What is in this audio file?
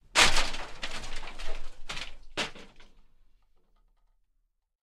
Body falls into debris
Body fall on to debris. With rattle and crunch sound.